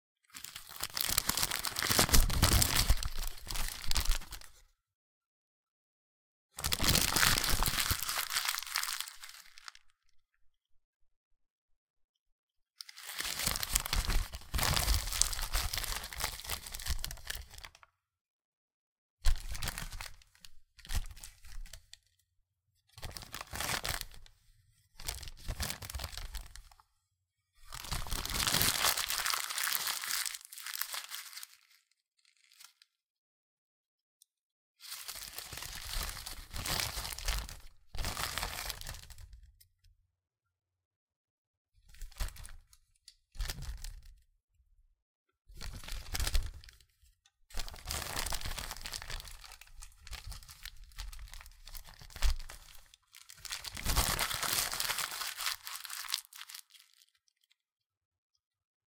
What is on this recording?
Receipt Crinkle
Recorded for The Super Legit Podcast, this is the sound of receipt paper being uncrinkled from a balled-up state, crumpled back up again, and moved back and forth between these states with some breaks to generally smooth out and rustle the paper as though interacting with it.